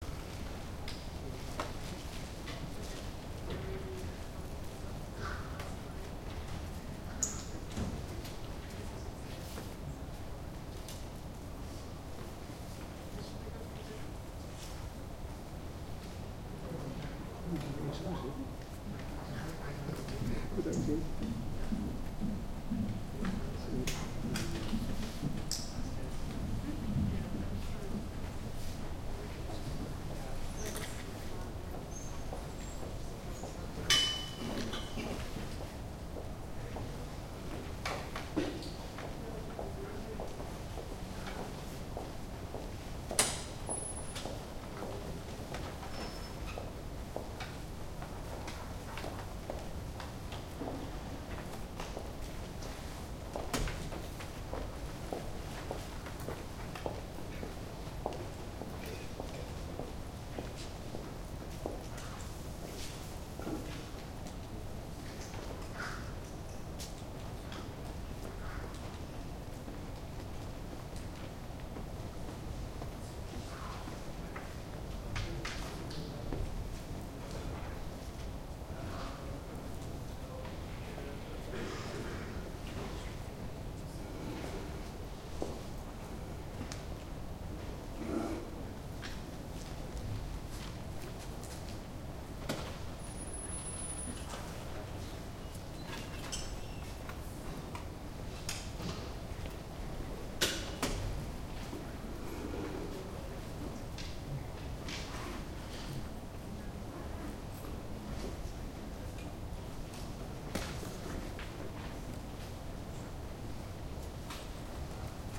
20121112 TU Delft Library - general ambience from high stairs
The library at Dutch university TU Delft. Recorded from high stairs used to get books. Footsteps, voices and door sounds in the background. Recorded with a Zoom H2 (front mikes).
netherlands, field-recording, ambience, university, zoom-h2, big-space, neutral, library, public-building